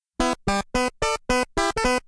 short sound : 2seconds
sound cut with Audacity, then i did change the speed of the sound, i did put the scroll to 20.
loop, sound, music, short